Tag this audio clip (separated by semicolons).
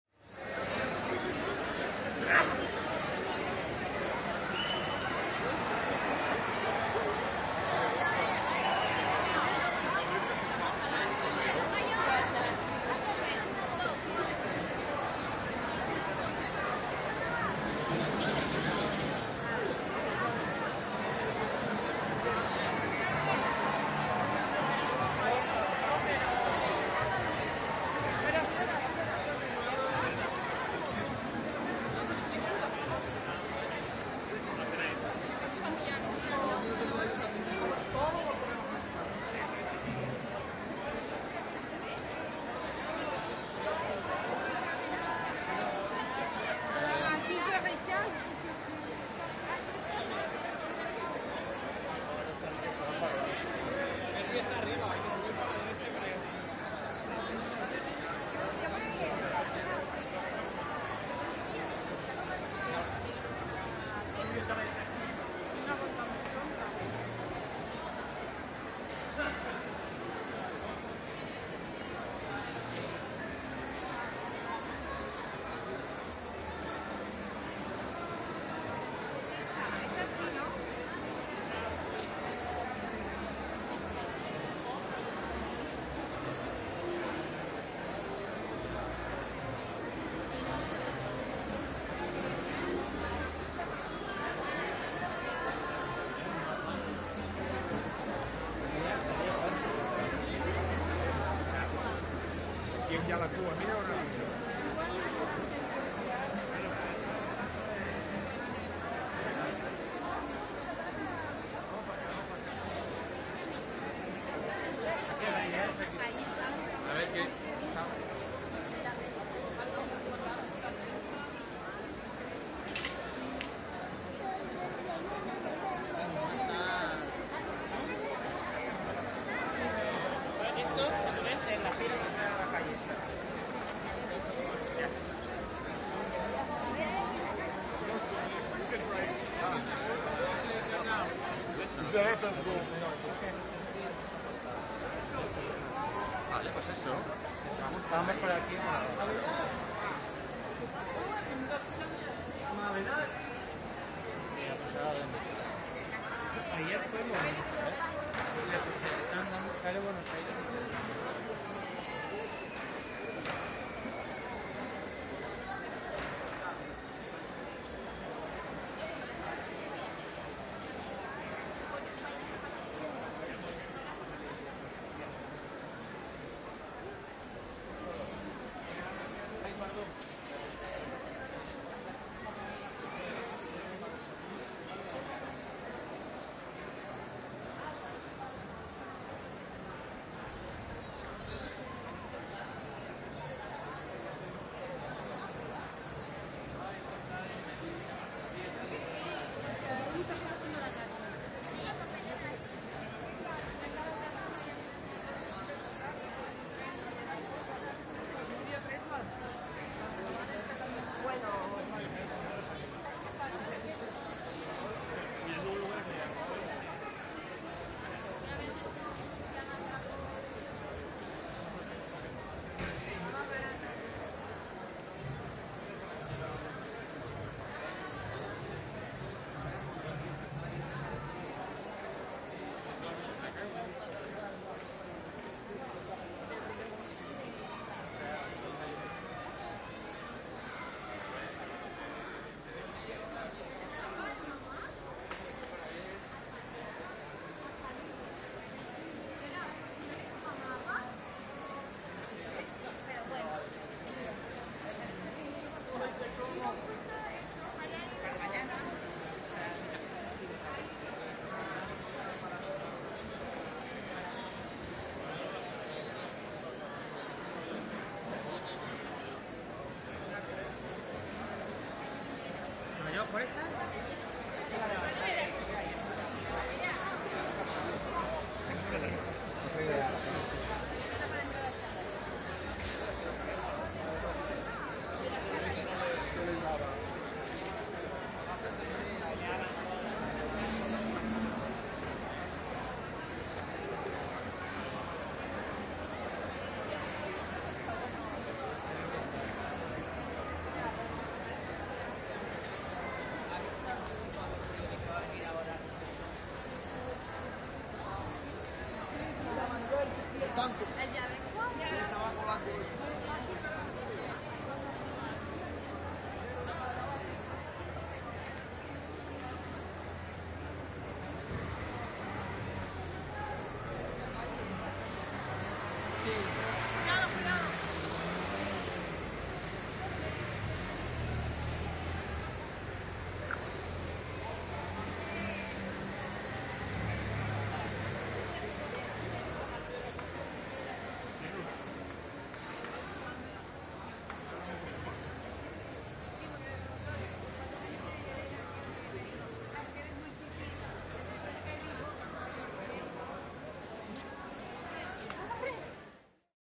people; field-recording; barcelona; spanish; voices; crowd; street; binaural; spain